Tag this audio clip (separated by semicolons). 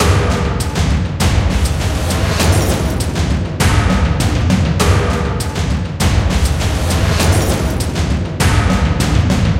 Cinematic; Movie; Action; Drum; Drums; Film; Percussion; Loop; 100bpm; Hollywood